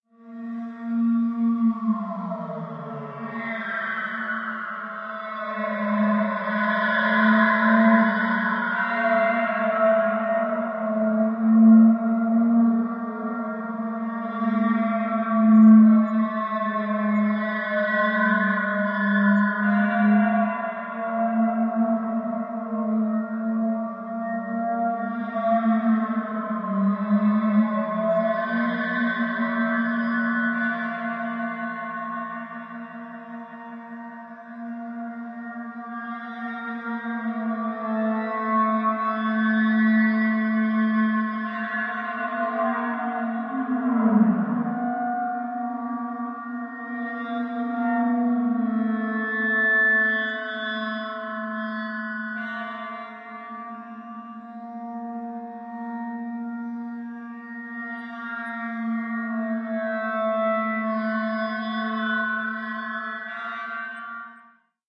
Clarinet processed through a series of shifting delay lines and filters in pd.
ambient, clarinet, drone, processed